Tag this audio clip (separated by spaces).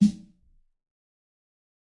drum fat god kit realistic snare